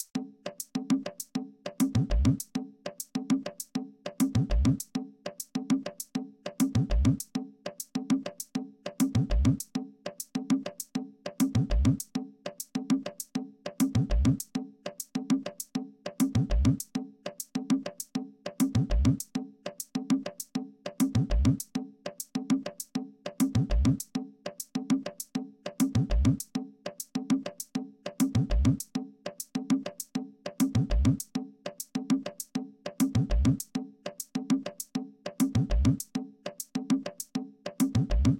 tabla - 100bpm
Tabla/Conga beat at 100bpm. Four bars. Created in Reason 2.5: generated in Redrum, so there isn't much feel, but combined with other percussion in this pack works sweetly.